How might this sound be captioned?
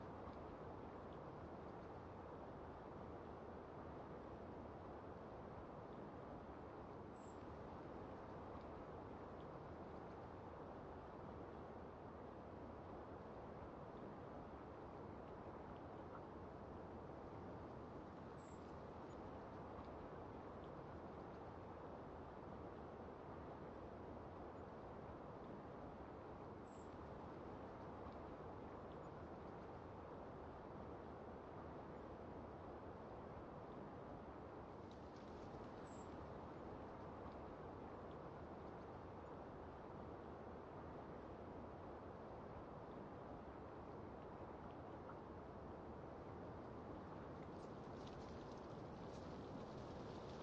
wind steady distant forest roar air tone active
forest, roar, active, distant, air, steady, tone, wind